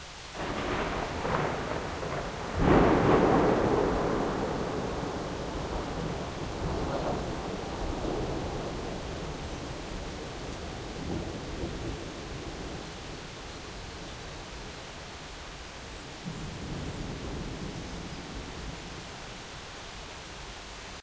One of the thunderclaps during a thunderstorm that passed Amsterdam in the morning of the 9Th of July 2007. Recorded with an Edirol-cs15 mic. on my balcony plugged into an Edirol R09.
field-recording, nature, rain, streetnoise, thunder, thunderclap, thunderstorm